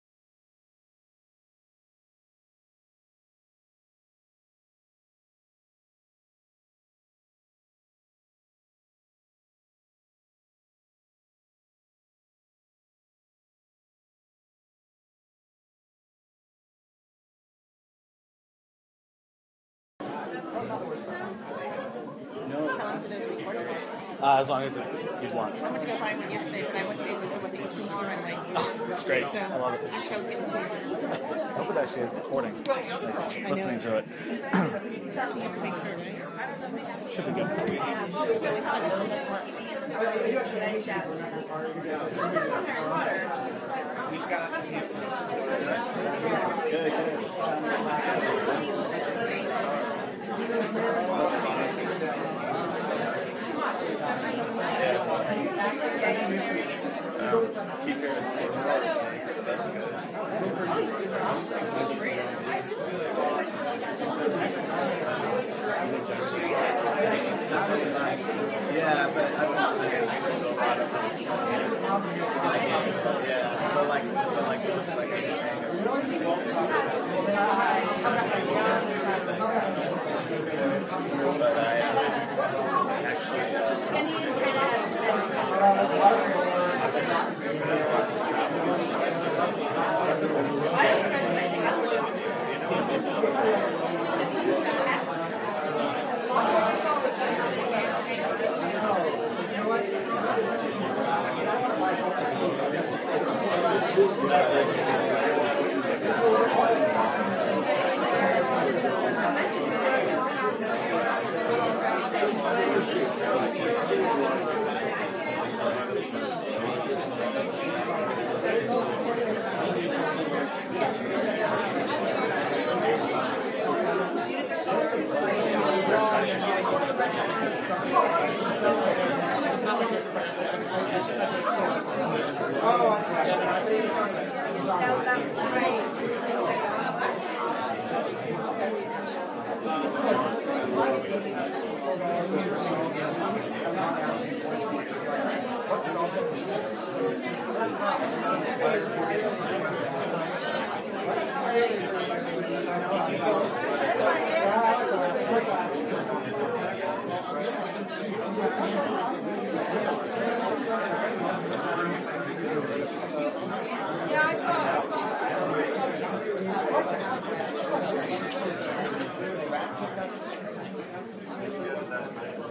conference chatter 3
About 2:50 of nondescript chatter of a medium sized crowd. Recorded from a conference, in-between speakers.
ambiance, chatter, conference, conversation, crowd, noise, office, people